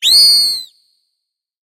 Moon Fauna - 119
Some synthetic animal vocalizations for you. Hop on your pitch bend wheel and make them even stranger. Distort them and freak out your neighbors.
alien, animal, creature, fauna, sci-fi, sfx, sound-effect, synthetic, vocalization